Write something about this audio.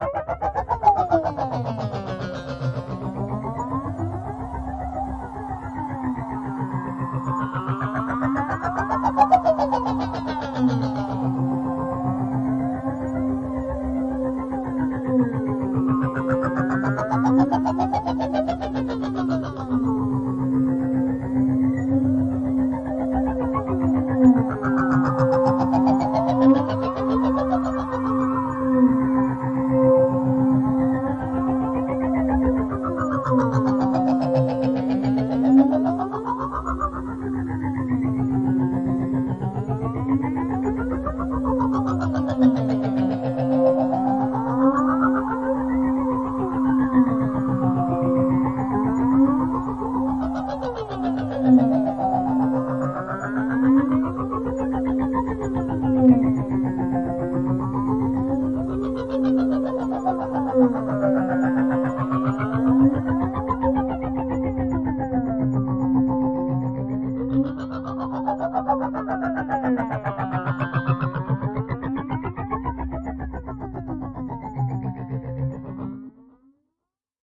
Drone Made with Ableton